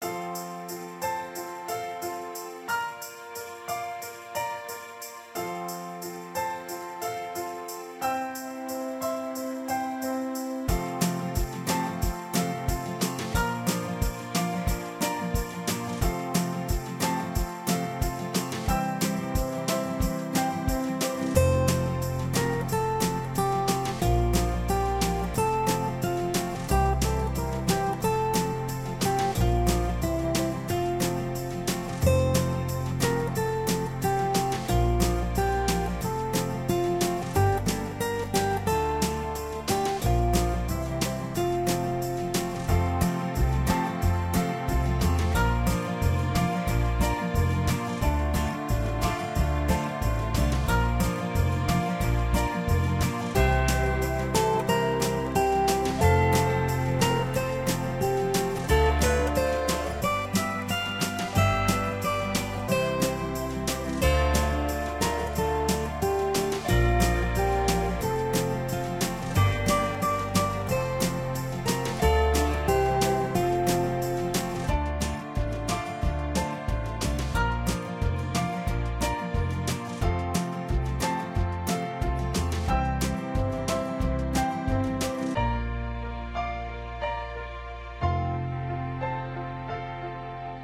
Xmas Jam 2
Just a quick holiday melody made by Music Maker Jam